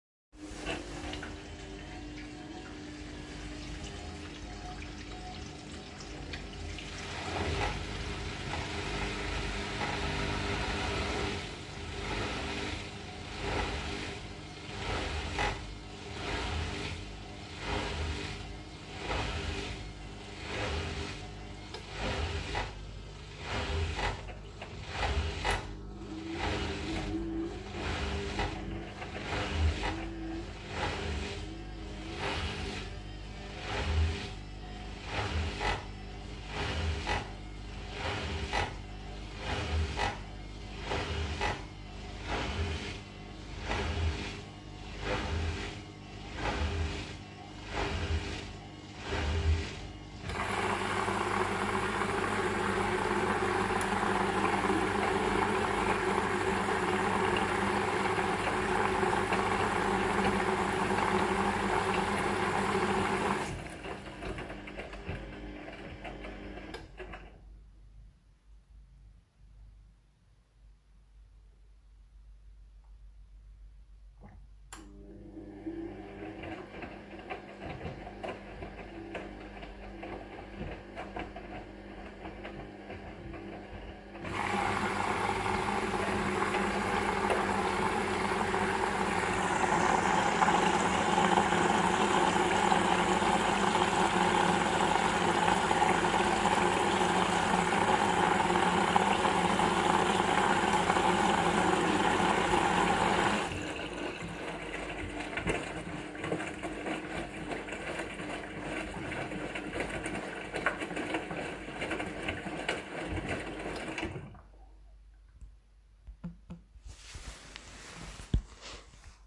Waschmaschine-Rhytmus
Recording a washing machine in the wash cycle
drain, flow, water